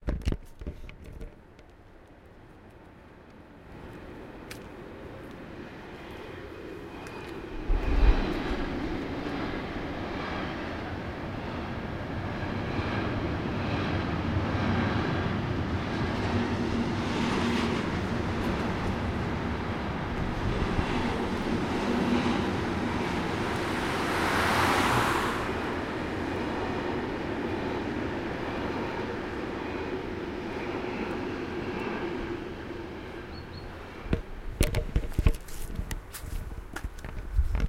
train futher away
train assingfurther away in small german village
rail, distant, train, passenger-train, field-recording